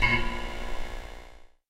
de-gaussing my monitor
field-recording, percussion, contact-mic